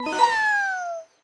Sound made for a game where a rat says yay when the player is successful.